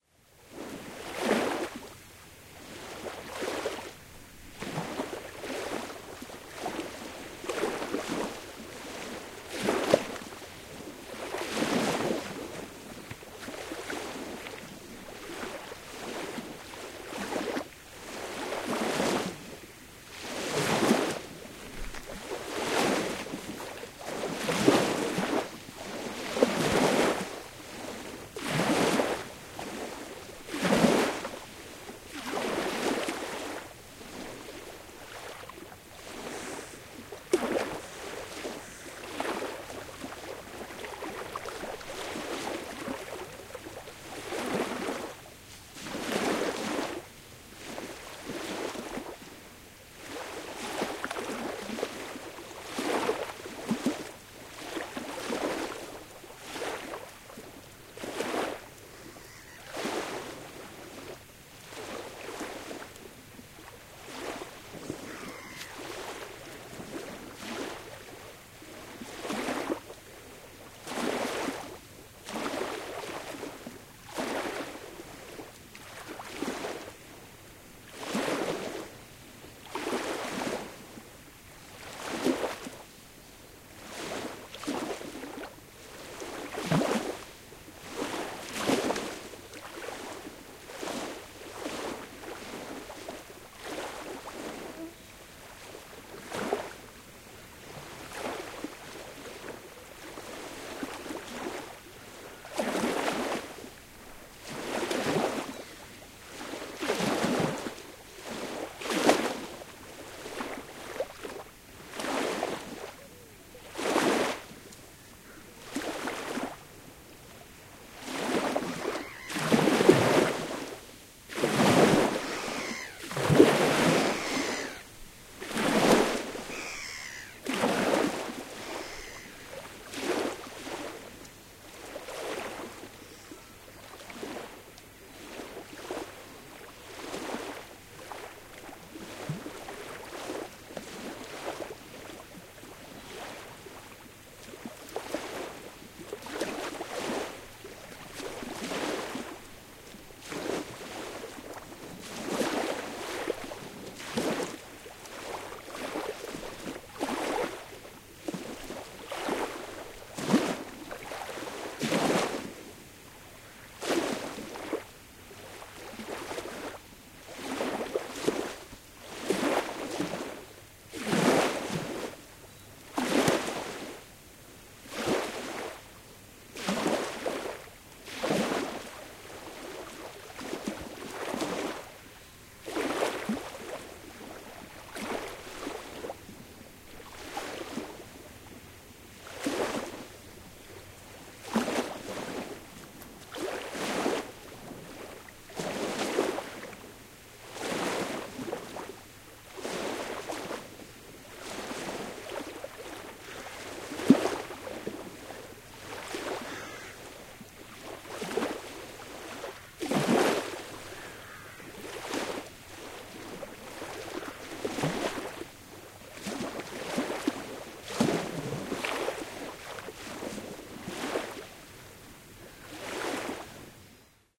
Recorded one wonderful morning at the shores of Lake Issyk Kul, Kyrgyzstan. Three hours from its capital Bishkek,
the lake with its little bit salty water and the mountainous landscape is a wonderful place to rest and relax.
Recorded with Sony RH910 Hi-MD recorder and Sony ECM MS907 Stereo Microphone at 120°.
issyk-kul, kyrgyzstan, lake, waves, yssyk-kol